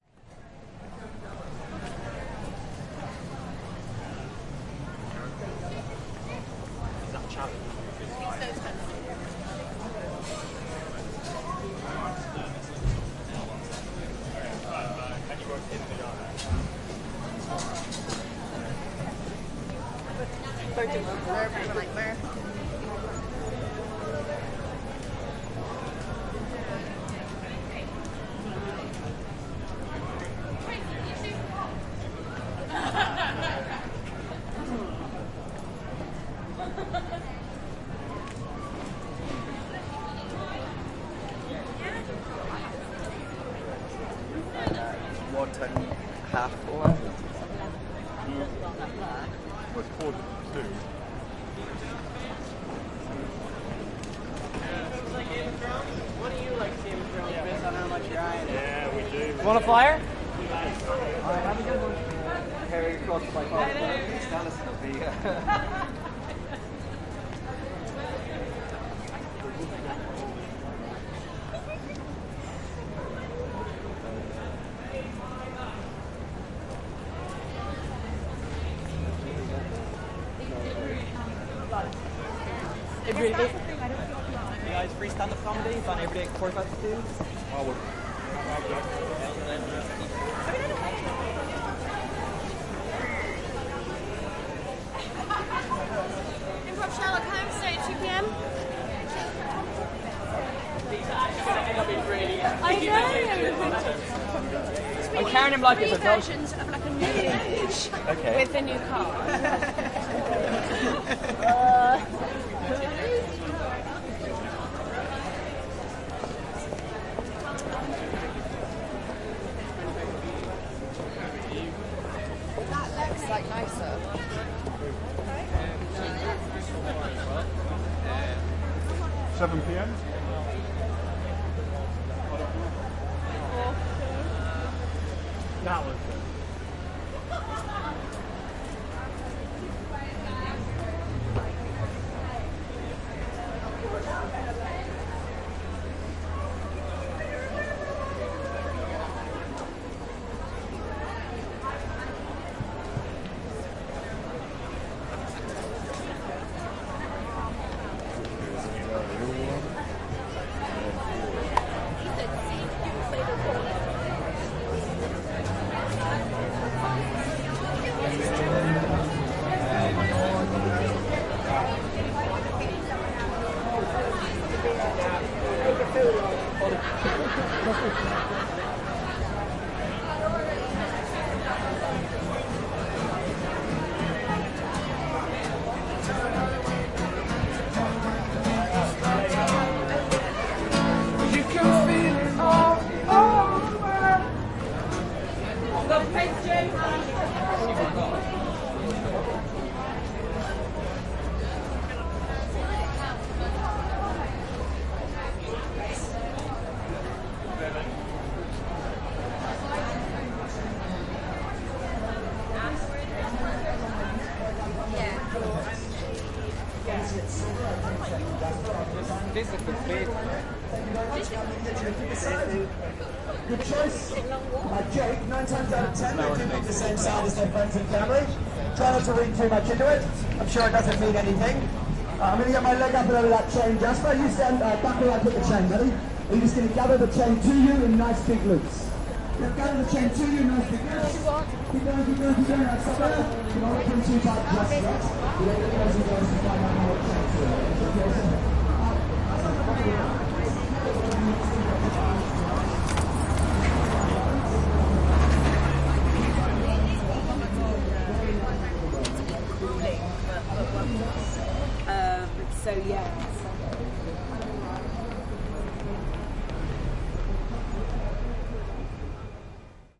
Edinburgh Fringe Festival
A walk down the High Street , Edinburgh during the festival fringe. People talking, people touting for shows, heavy traffic. Buskers performing.
Recorded of a zoom H5 with the mid-side capsule
people, city, field-recording, ambience, traffic, street, speaking, buskers, noise, High-Street, soundscape